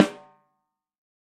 TAC14x8 RE20 VELO7
The loudest strike is also a rimshot. Microphones used were: AKG D202, Audio Technica ATM250, Audix D6, Beyer Dynamic M201, Electrovoice ND868, Electrovoice RE20, Josephson E22, Lawson FET47, Shure SM57 and Shure SM7B. The final microphone was the Josephson C720, a remarkable microphone of which only twenty were made to mark the Josephson company's 20th anniversary. Preamps were Amek throughout and all sources were recorded to Pro Tools through Frontier Design Group and Digidesign converters. Final edits were performed in Cool Edit Pro.
14x8, artwood, custom, drum, electrovoice, multi, re20, sample, snare, tama, velocity